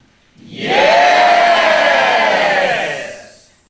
Audience Unison Yes
Recorded with Sony HXR-MC50U Camcorder with an audience of about 40.
crowd, audience, yes, unison, mob